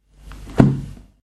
Closing a 64 years old book, hard covered and filled with a very thin kind of paper.
paper, percussive, lofi, loop, noise, household, book